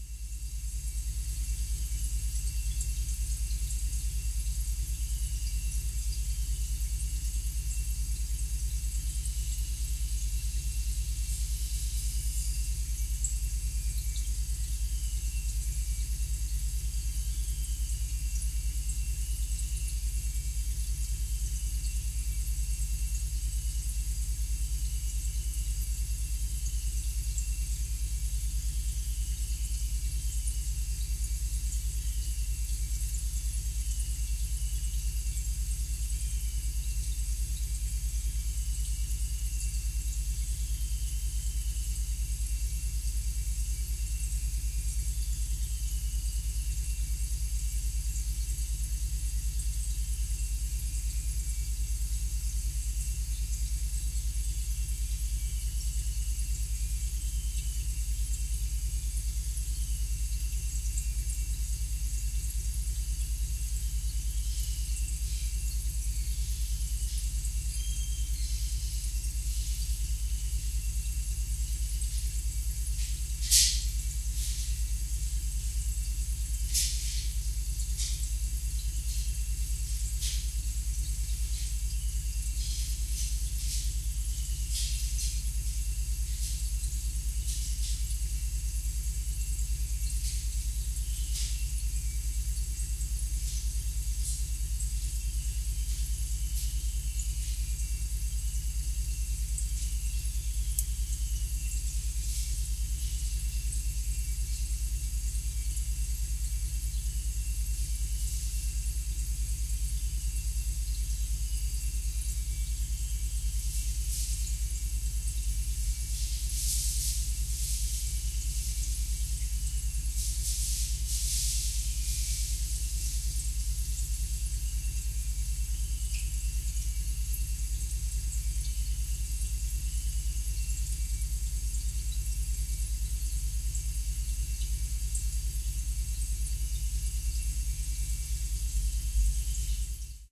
refrigerator song
The coolant part of the fridge in my apartment occasionally makes these high-pitched-jingly-water sounds.
field-recording, fridge, noise